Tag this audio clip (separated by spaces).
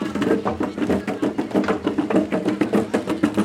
ciptagelar field-recording harvest jawa-barat rural sunda